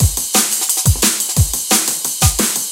Oggggm Power 176
The amen break into drumagog, so that it gets some punch. 176bpm
based, drumagog, bass, break, drum